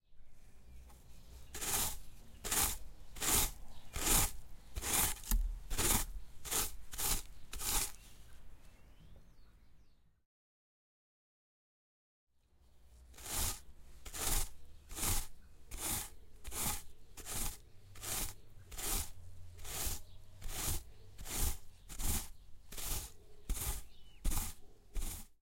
working with a rake
rake, garden